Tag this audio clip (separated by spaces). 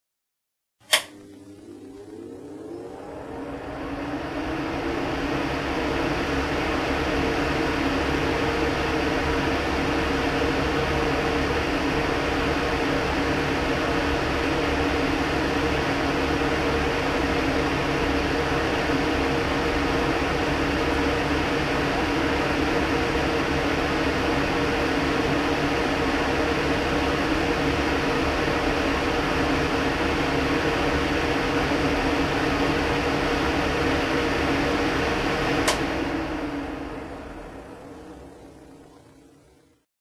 exhaust fan kitchen off switch